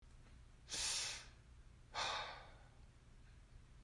A man sighs in frustration. Recorded for an animation.